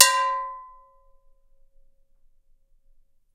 A skillet hit with a spoon